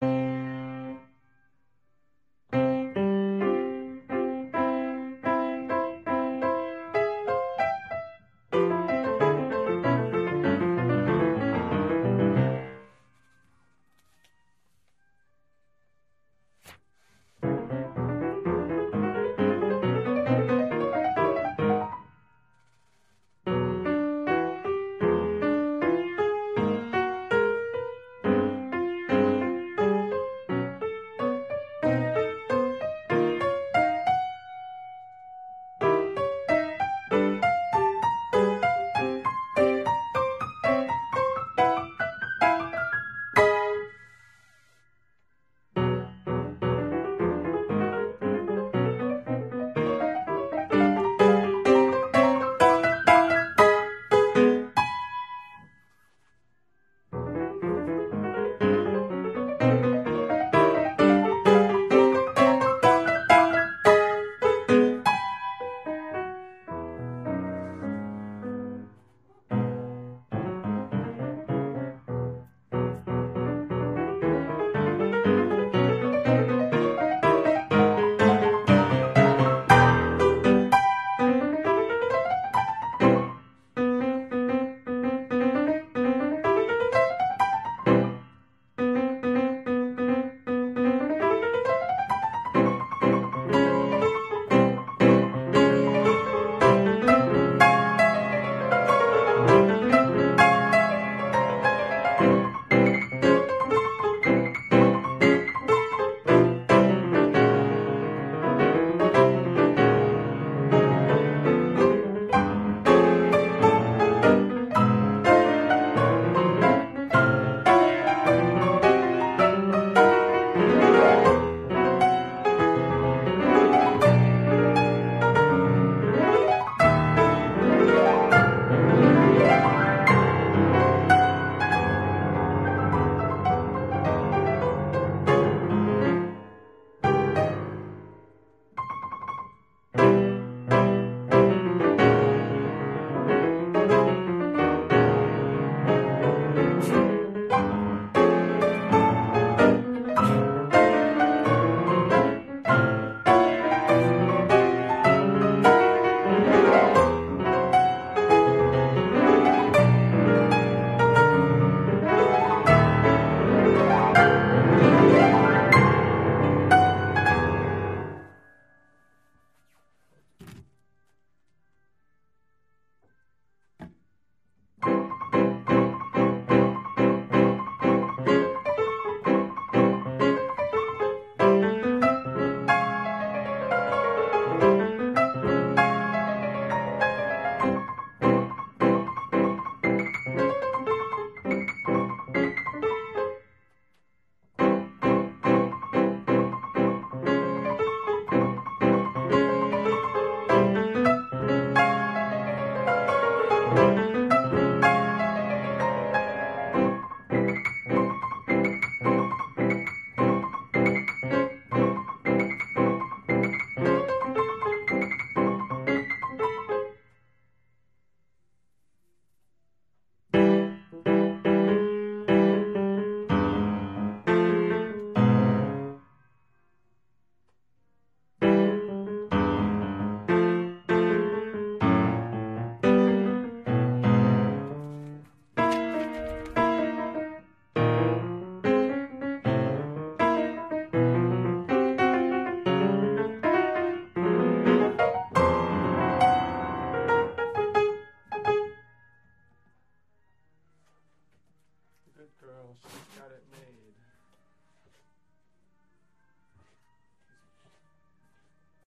Practice Files from one day of Piano Practice (140502)
Piano, Practice, Logging